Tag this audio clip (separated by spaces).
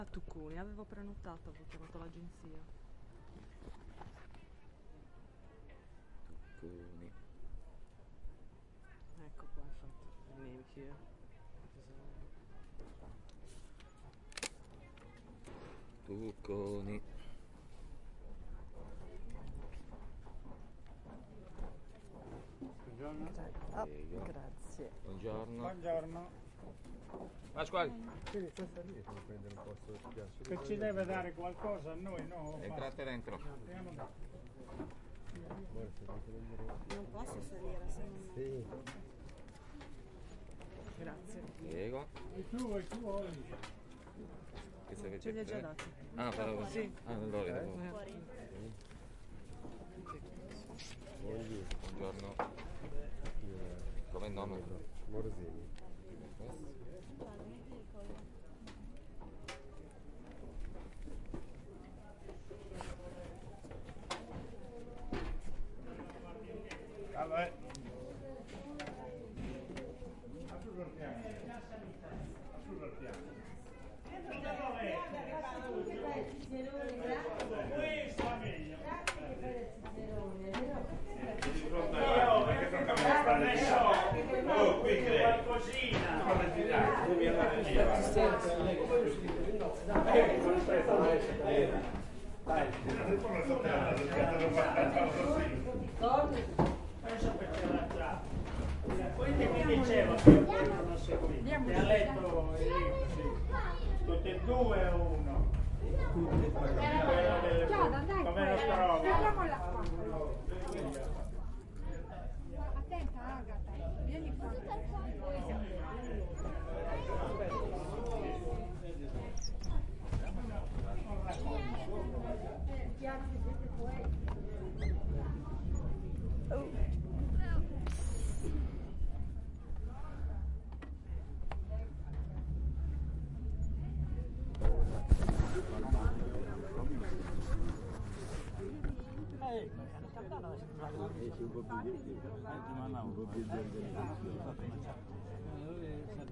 bar-on-the-beach Italy sardinia